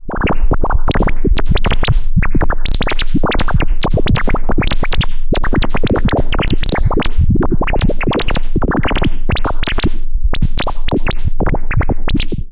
An eruption of popping bubbles. Made on a Waldorf Q rack.
bubbles, popping, synthesizer, waldorf